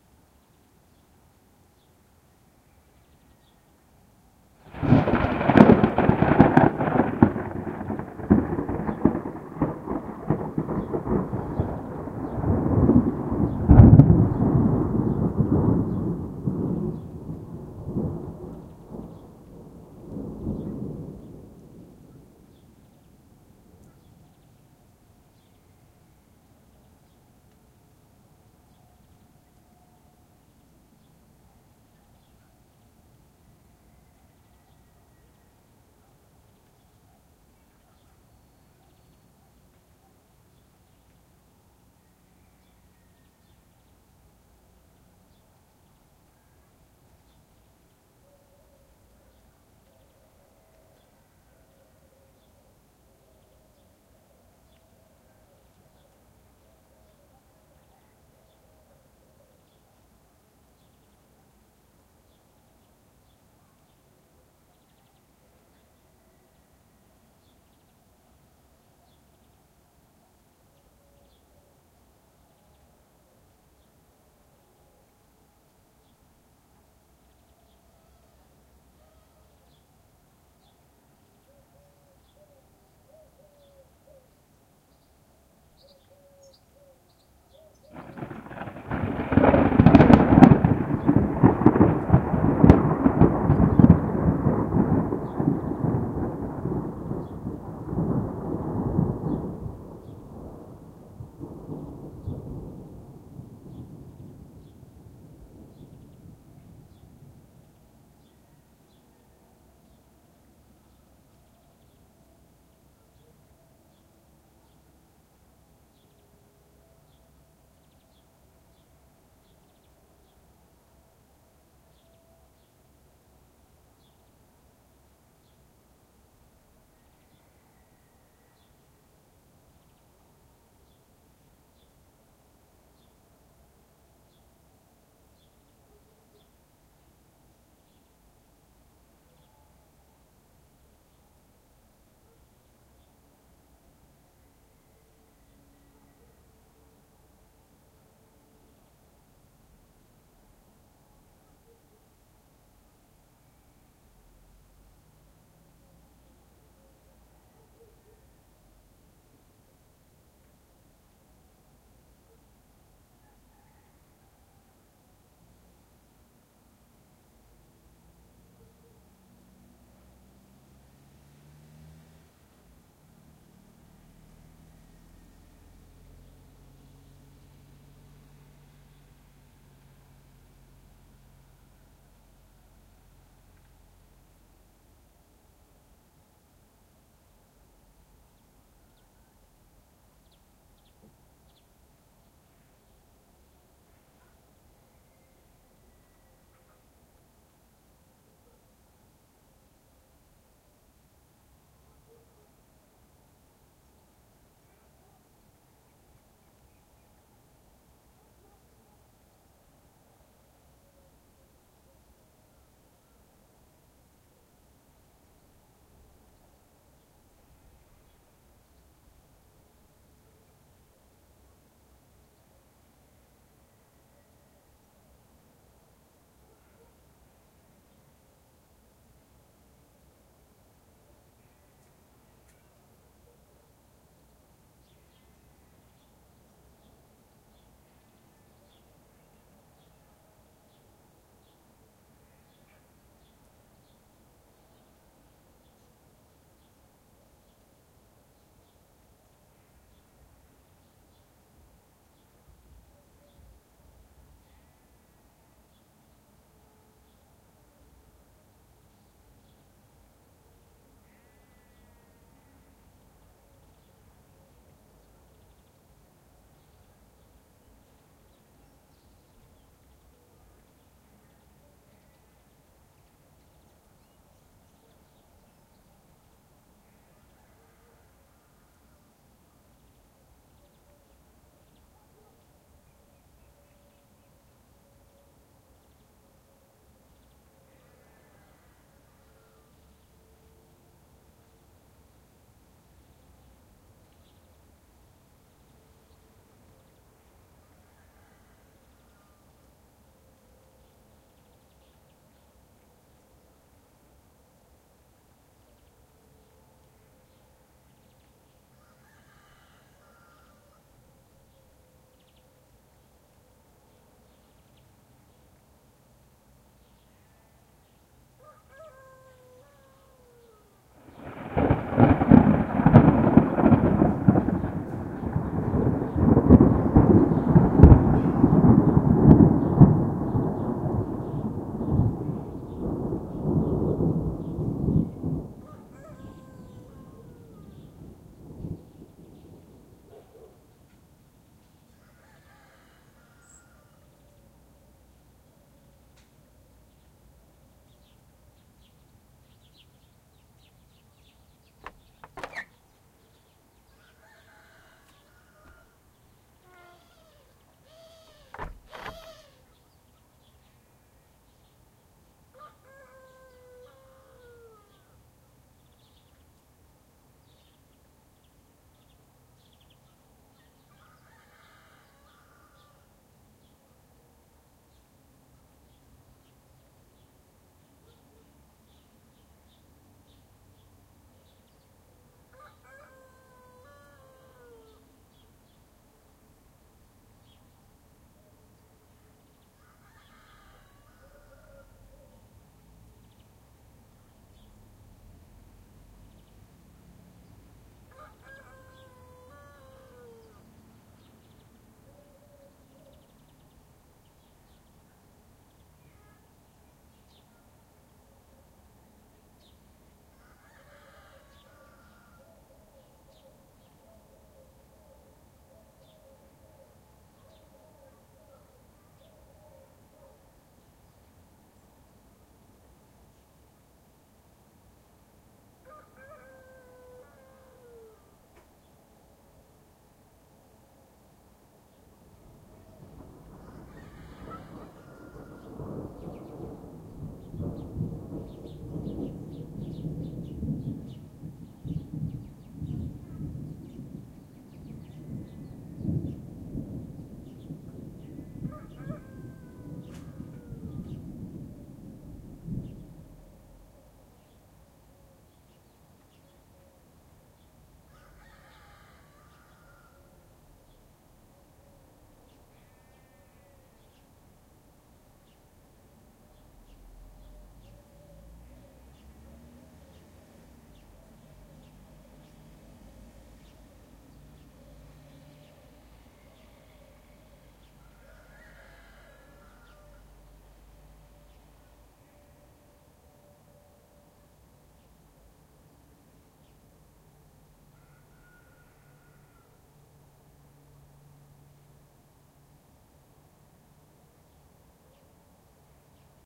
Thunderstorm reached Pécel on 26th July 2012, at 4pm. It produced 4 close lightning. I recorded 3 of them in good quality with my SONY stereo dictaphone.